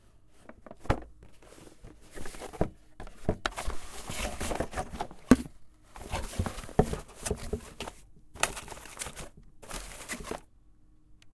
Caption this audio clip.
Cardboard Box Rustle
Opening cardboard box, then rustling what inside it.
box, rustle, cardboard